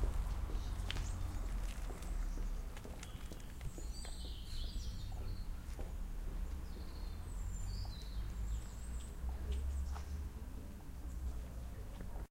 This is a sonic snap of some birds calling recorded by Laura and Amy at Humphry Davy School Penzance